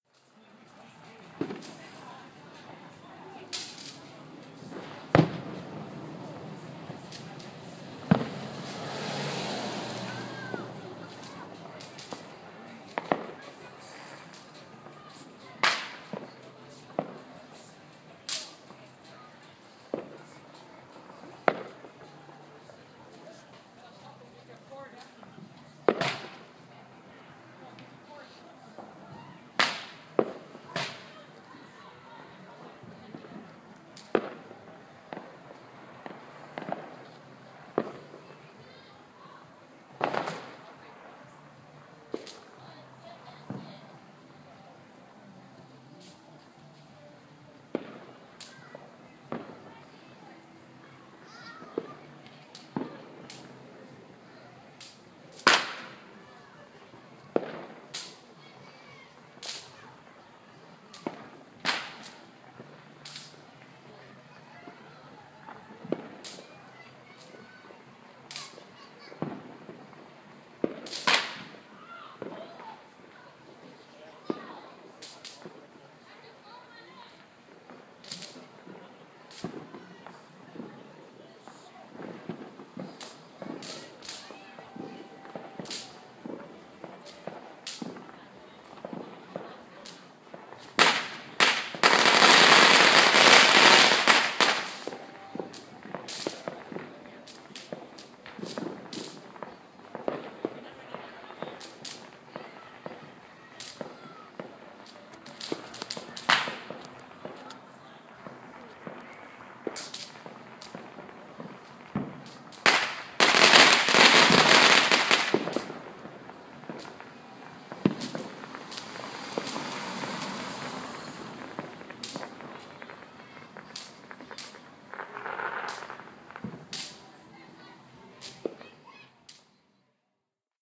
fireworks, outdoors, 2014-2015, 9 30 PM
Recorded 9:30 PM. A lot more fireworks! Some cars passing by. Recorded with my iPhone. Happy new year from Hawaii.
2015, car, cars-passing-by, new-years